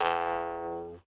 Jew's harp single hit
folk, folklore, jews-harp, lips, mouth-harp, tongue, vargan